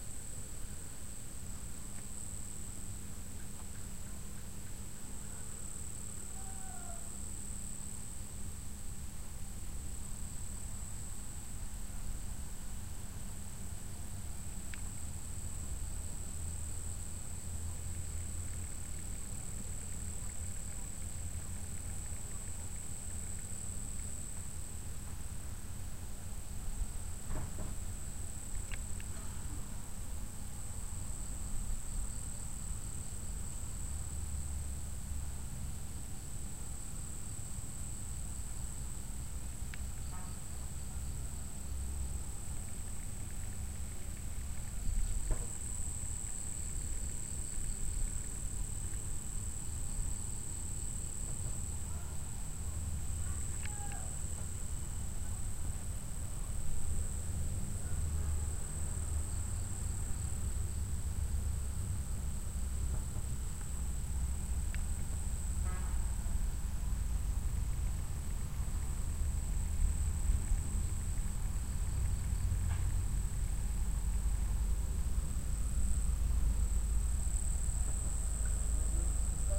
Jungle near the Kalibaru volcano. Java, Indonesia.
- Recorded with iPod with iTalk internal mic.
crickets, indonesia